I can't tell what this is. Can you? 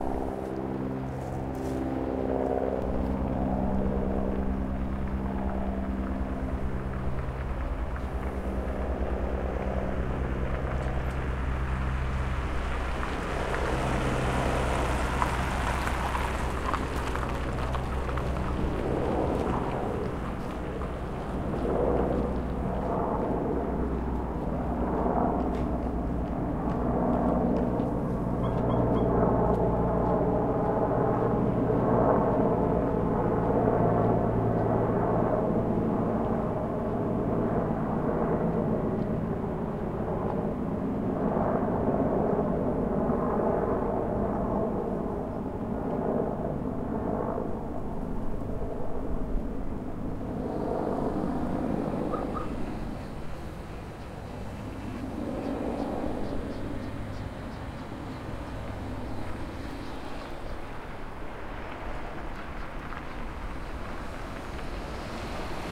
One helicopter flies over the street in the city.
Recorded at 2012-11-01.
city, helicopter, street, town, noise